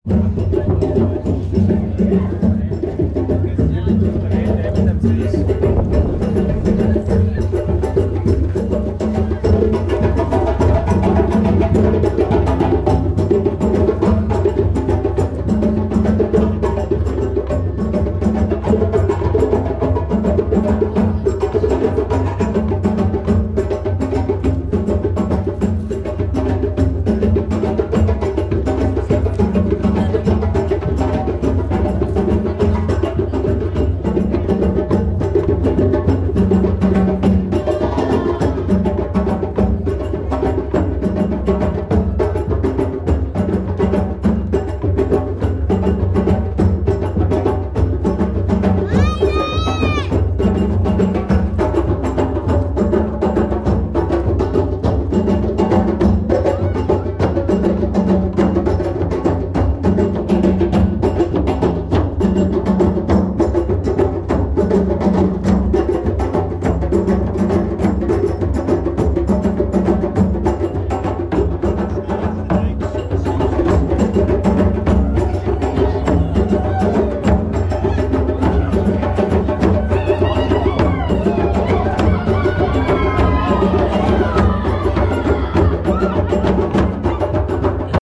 Sommersonnenwende Glastonbury Tor
We are to the Summer Solstice on Tor Hill, in Glastonbury